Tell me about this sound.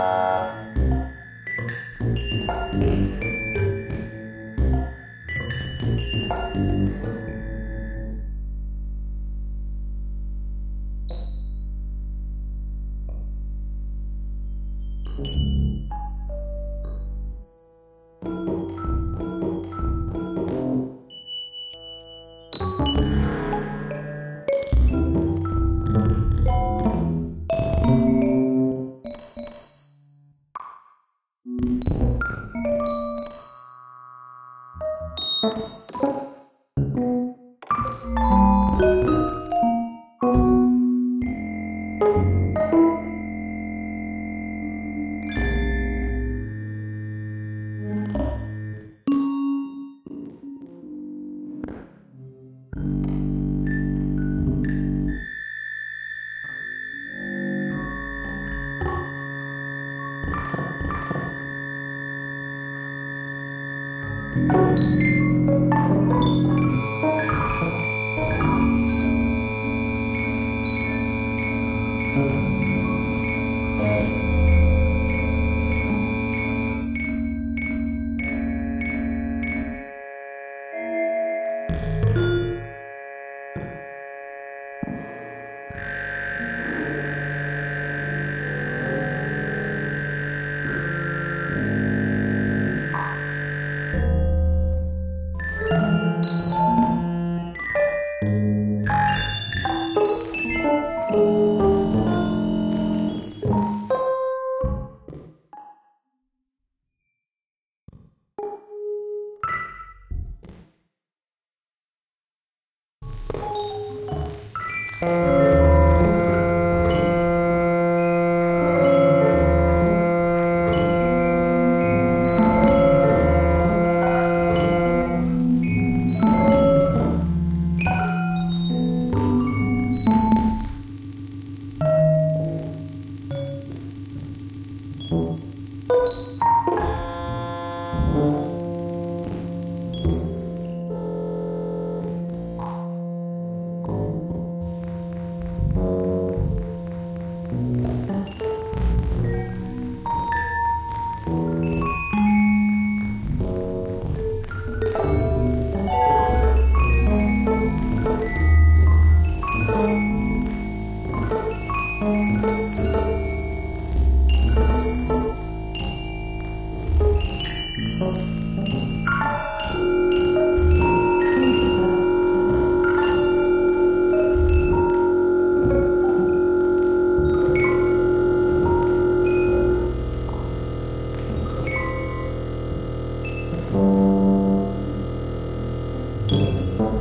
4th version of random patch 62
artificial, electronic, random